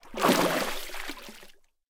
Water Motion 01
Sound of a slight water movement.
Gear : Rode NTG4+
moving, motion, movement, field-recording, Water, shake